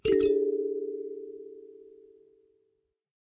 Kalimba (roll 4)
A cheap kalimba recorded through a condenser mic and a tube pre-amp (lo-cut ~80Hz).
Tuning is way far from perfect.
thumb, instrument, kalimba, piano, thumb-piano, ethnic, african